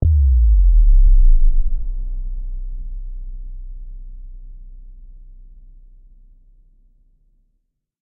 Sub Down
just add it to any low key moment. not made to be in the front of the scene.